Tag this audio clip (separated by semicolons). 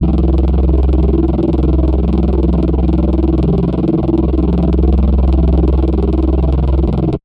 factory; dystopia; vocoder; sample; special; machinery; fx; dystopic; loop; biohazard; effects; effect; fan; game; studio; fl; industrial; sound; rustic; audio; toxic; warehouse; wave; industry; machine